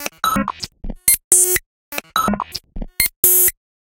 MinimalBuzz 125bpm04 LoopCache AbstractPercussion
Abstract Percussion Loops made from field recorded found sounds
Abstract, Loops, Percussion